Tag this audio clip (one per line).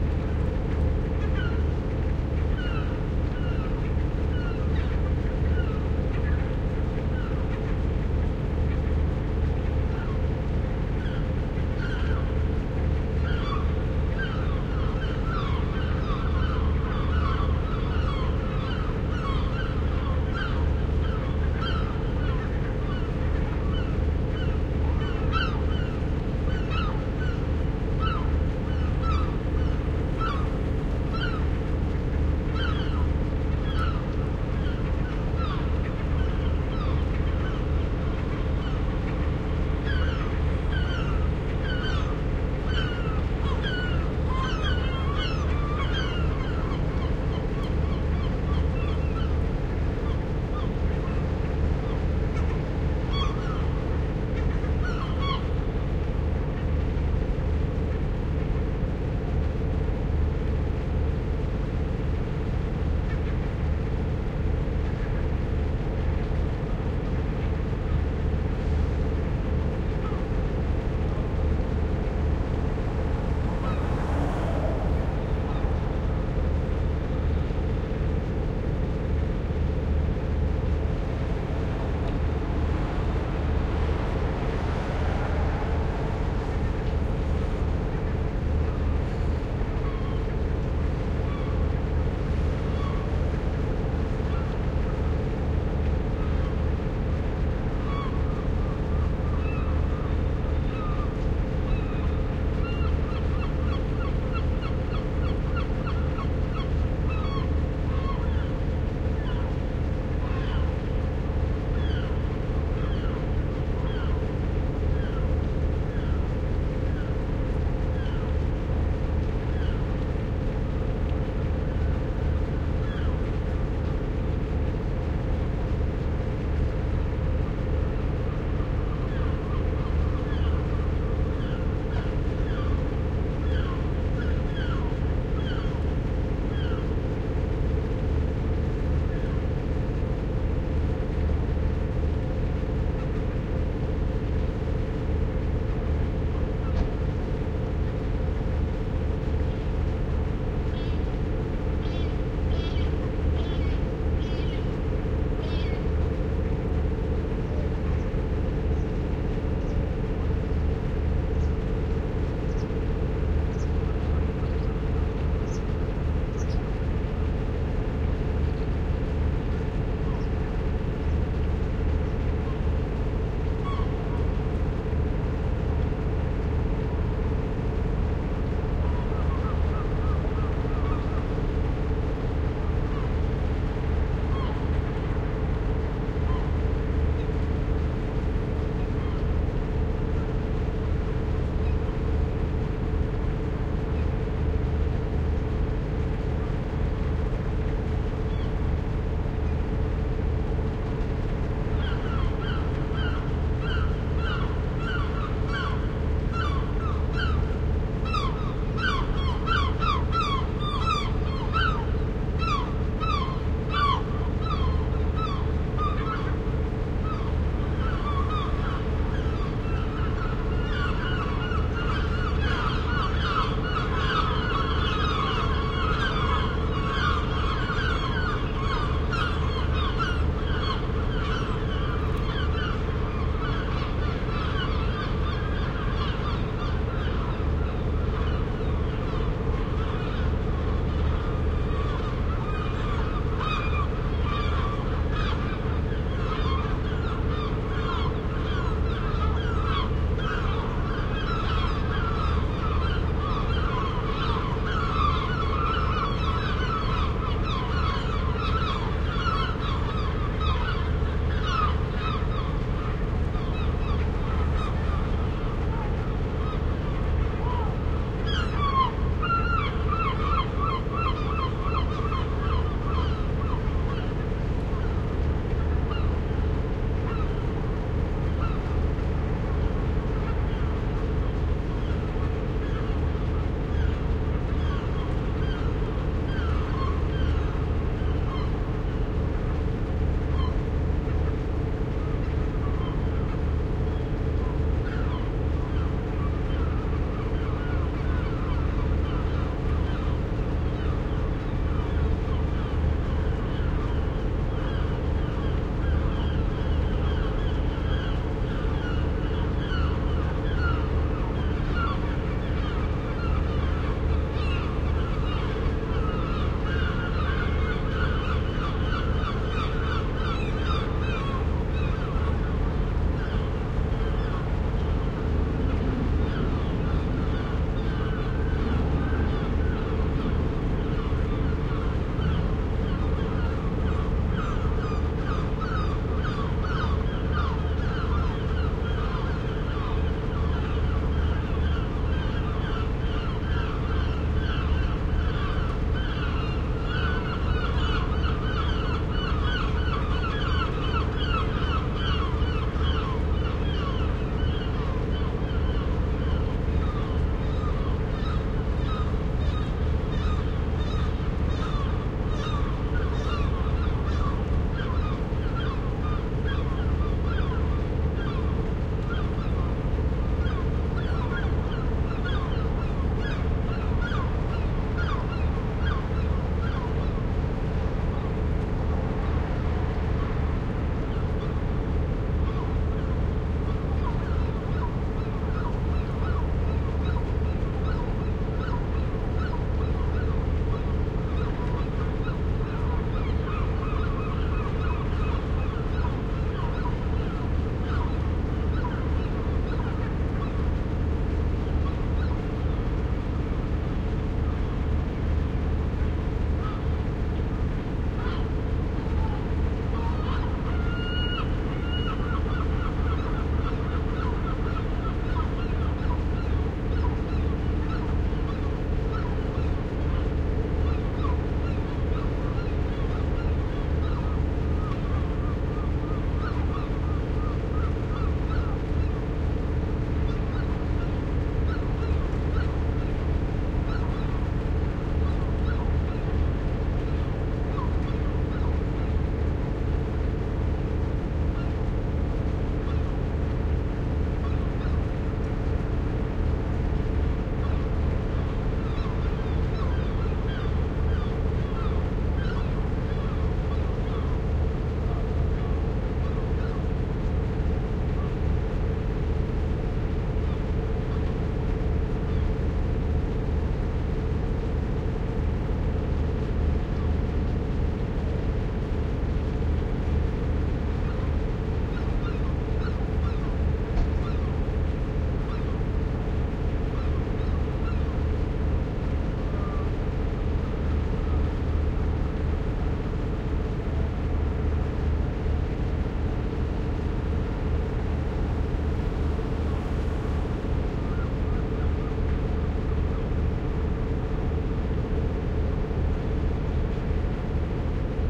field-recording
flickr
harbour
ijmuiden
netherlands
northsea
seagulls
ship
ships